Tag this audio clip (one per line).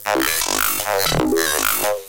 115
bpm
noise